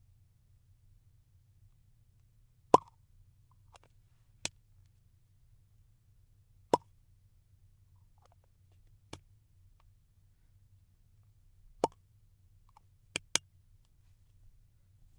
PillContainerOpening/Closing
I am opening and closing a pill container. I recorded in the Learning Audio Booth. This pill container makes a good popping noise when it is being opened.
pop, closing, popping, pill, bottle, plastic, container, opening